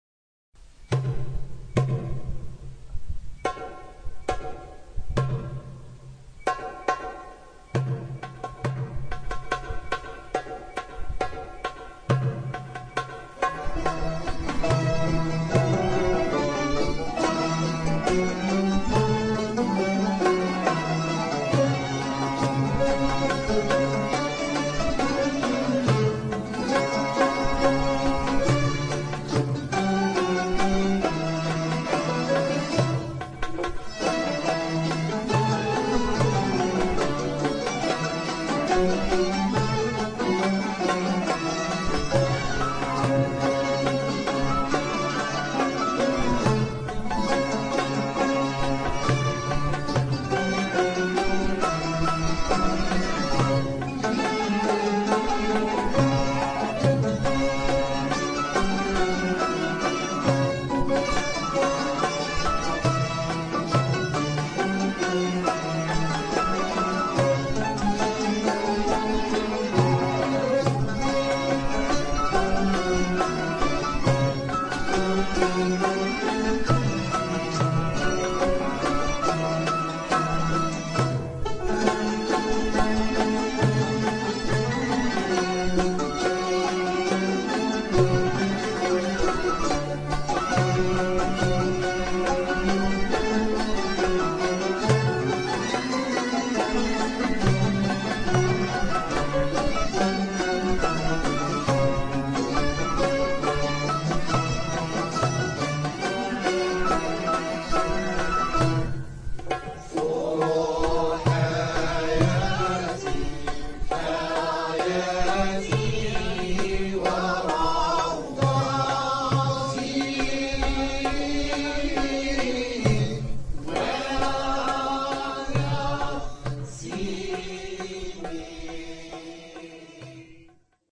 Qaim Wa Nisf Mwessa3 Rhythm+San'a
Qáim Wa Nisf muwassa' (slow) rhythm with ornaments, applied to the San'a "Waslak Hayátí" of the mizan Qáim Wa Nisf of the nawba Isbahán